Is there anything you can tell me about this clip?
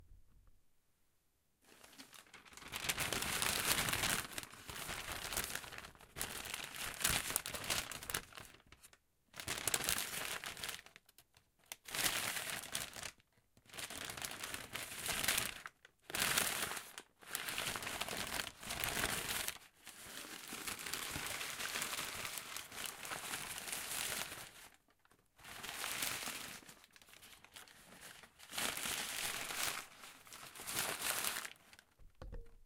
Folding paper
Close-up sound of paper folding.
folding, paper